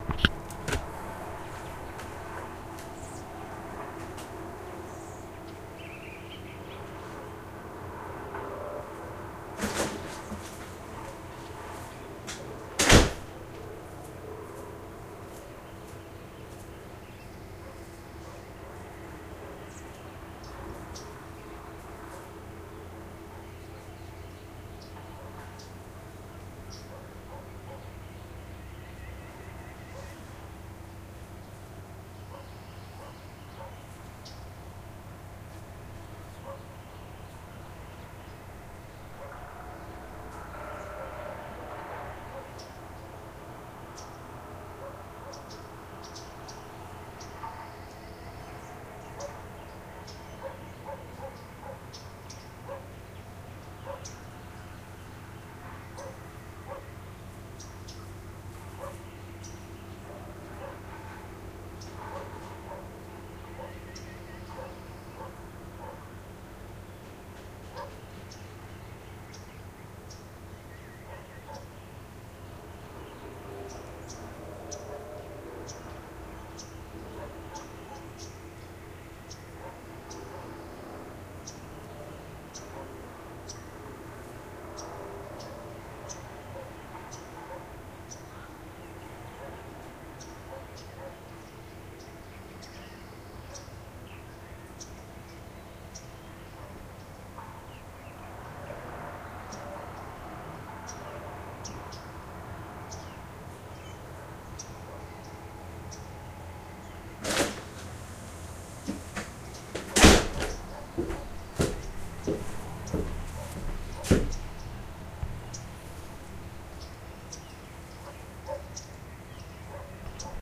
Sunny afternoon
Back porch in Moncks Corner, SC
Carolina, South, afternoon, airplane, ambient, birds, dog